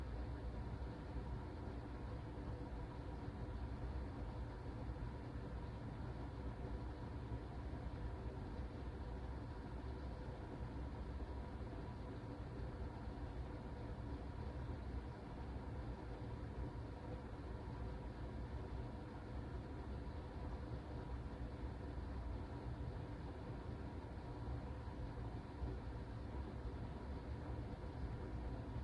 Recorded the air conditioner in our room while in Amman/Jordan last summer with HQ Recorder for iOS.
room, atmosphere, dormitory, ambiance, summer, air-conditioner, hot, cold, ambient, AC, weather, conditioner, dorm, field-recording, ambience, air, Amman, cool, Jordan